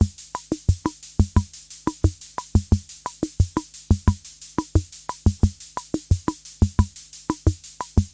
JanCasio 88.5bpm
retro drum-machine loop recorded from 70´s organ-----------------------------------------------------------------------------------------------------------------------------------------------------------------------------------------
analog, drum-loop, drum-machine, electro, percussion, retro, vintage